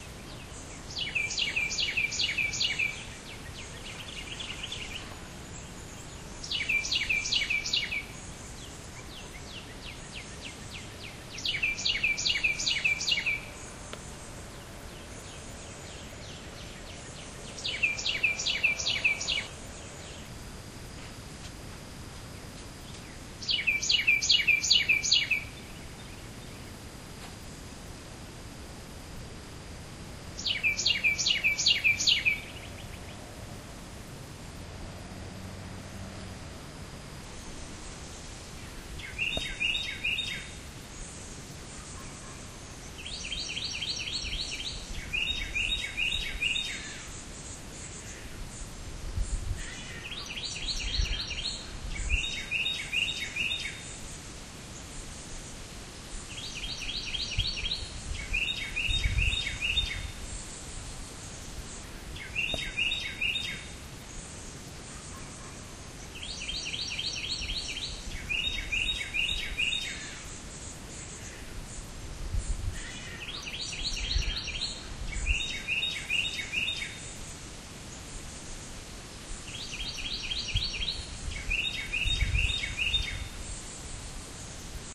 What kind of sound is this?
Chirping Birds 2008
Chirping Birds at my home in Arkansas.
birds, chirping